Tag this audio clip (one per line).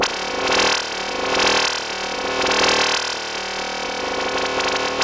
synthesized
soundscape
ambient